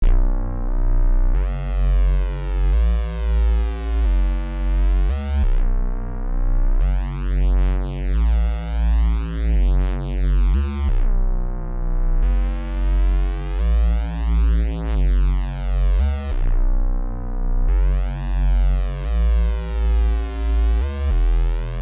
east river twisted 88bpm
makes me feel like i'm in the dark, it's raining, and something with bright red eyes is at the other end of the alley........
dark, strong, scary, dangerous, bass, bassline, loop, reason